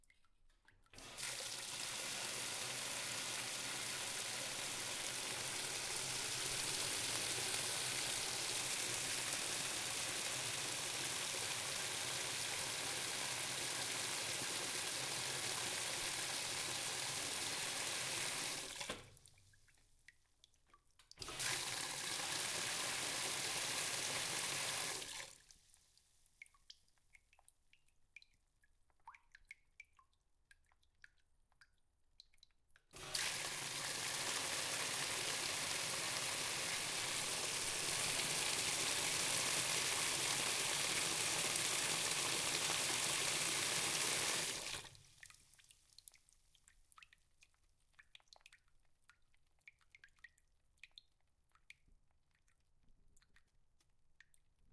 Wash Machine open and close.water dripping close perspective.recorded with a sony pcm d1.